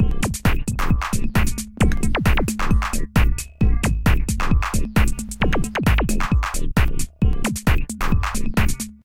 Lovely belting little groove I made in Ableton.